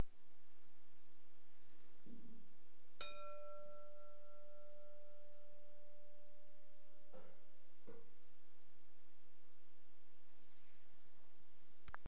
Striking glass bowl with the nail.
bowl, glass, nail, striking